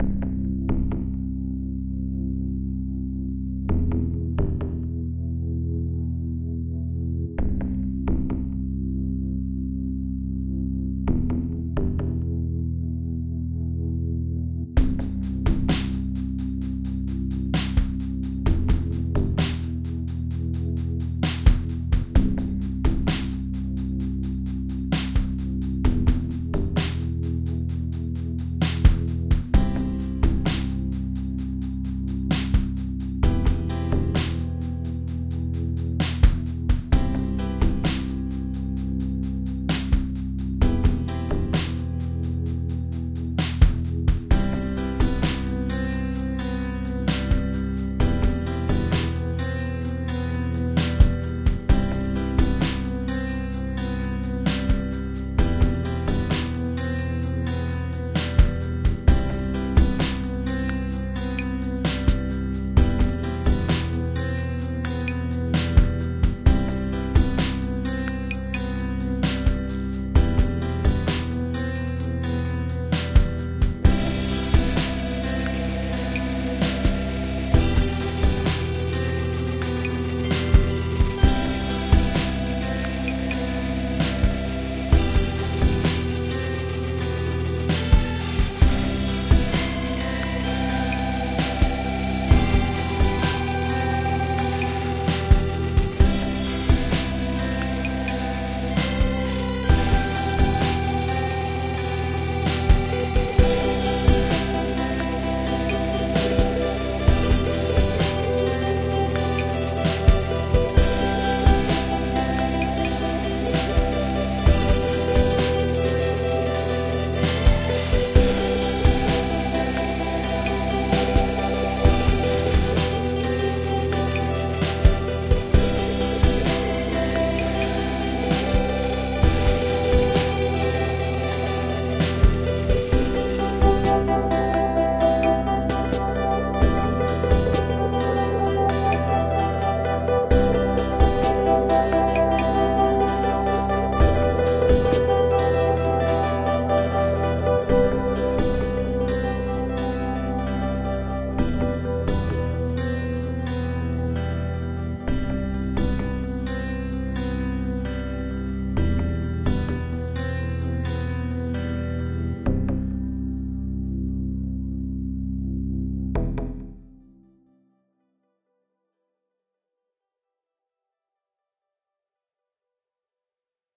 Float and Fly
A slow moving, atmospheric instrumental, good for an outro
science, fly, piano, tech, atmospheric, float, synth, technology, outro, guitar, slow